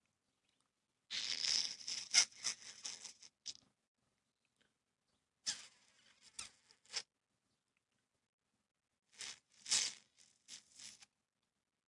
Nearly finished toothpaste recorded with the V8 Sound Card.
OWI, paste, tooth